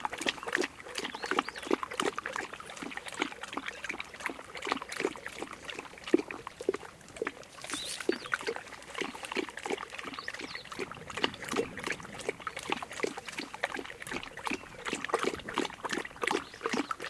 20061208.dog.licking.01
a huge mastiff dog drinks (in a puddle!)